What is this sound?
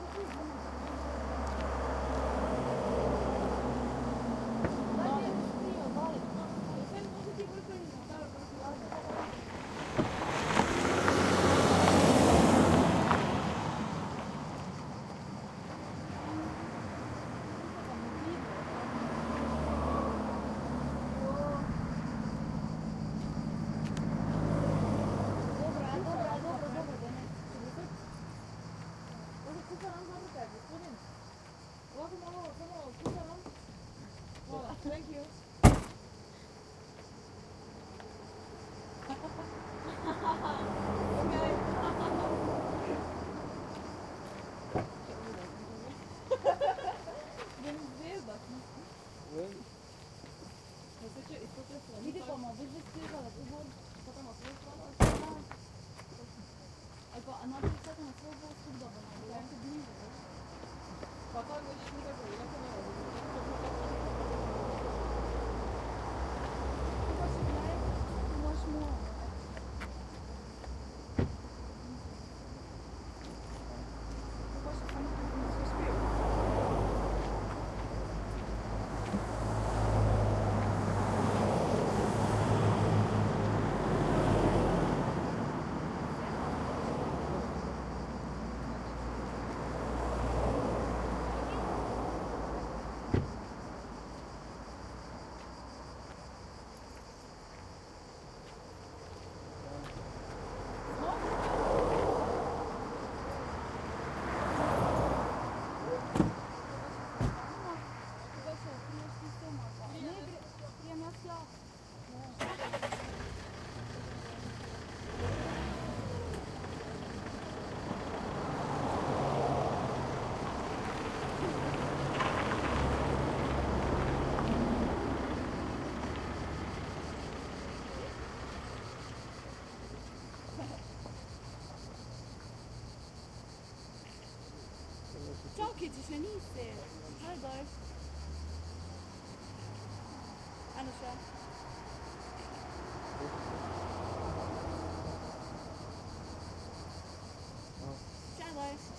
4ch surround recording of a rest area on the Jadranska Magistrala, a coastal road in Croatia near the town of Ploce. It is early afternoon, voices of people on the rest area can be heard, predominantly those of a group of young Slovenian tourists. Cars passing on the road nearby can be heard in the midrange.
Recorded with a Zoom H2. These are the FRONT channels of a 4ch surround recording, mic's set to 90° dispersion.
4ch, ambiance, ambience, arid, atmo, cars, countryside, crickets, croatia, field-recording, mediterranian, people, road, rural, surround